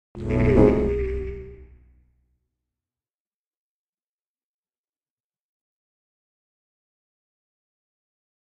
Spooky Sting
A soft sting made in Logic Pro X.
I'd love to see it!
musical, spooky, reveal, soft, moment, dissonant, hit, haunted, realization, theremin, dark, creepy, movie, stab, dramatic, sting, cinematic, instrument, film, short, quiet, inquisitive, eerie, chord, suspense, synth, stinger, drama, horror, tuba